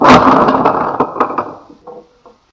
Big bricks falling sound
A sound of big bricks landing from a higher place
boom, booms, bricks, fall, falling